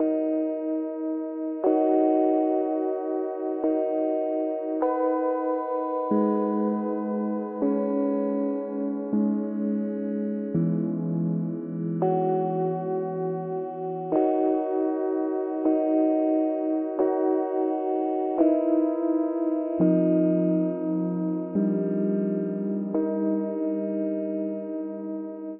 Short Piano Theme from a Absynth Piano i´ve created. Recorded with the builtin Recorder ... Lightly processed
Absynth, Keys, Piano, processed